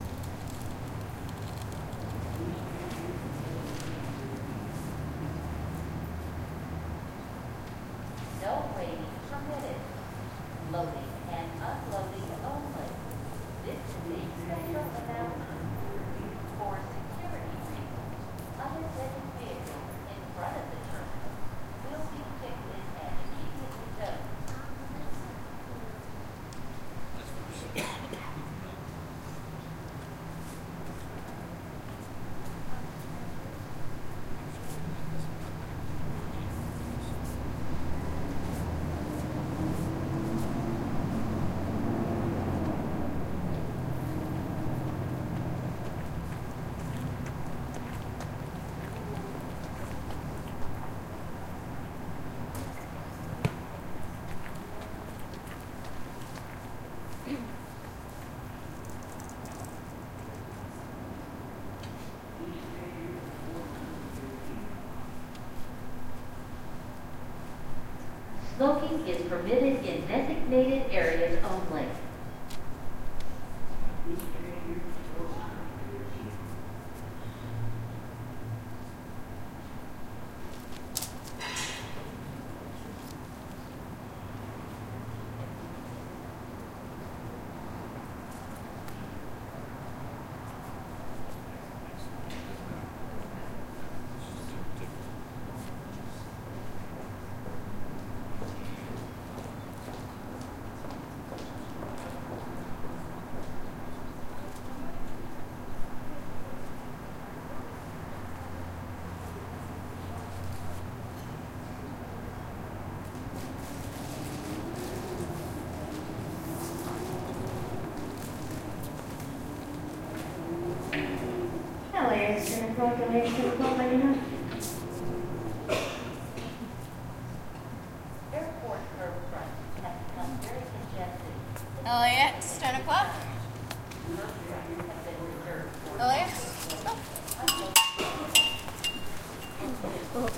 Van Nuys Fly-Away Atmosphere 2
Taken at the Van Nuys Fly-Away bus station in Van Nuys, CA. I used a Tascam DR-07 MKII recorder. It was approximately 9am/10am and I was seated in the waiting area indoors. The doors to my right were directly to the outside passenger loading area for buses. I used WavePad for OSX to boost the volume.
bus, interior, transportation, van-nuys